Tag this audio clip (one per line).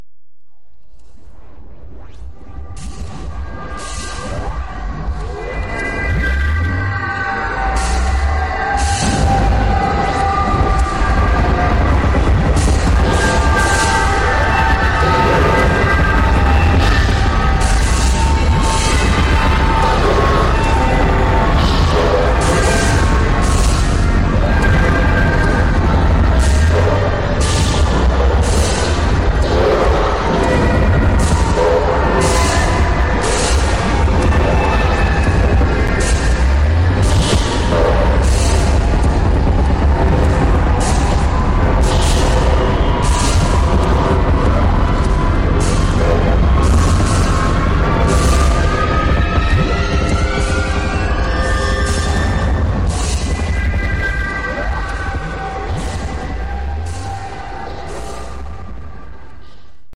atmosphere
effect
end
experimental
noise
processed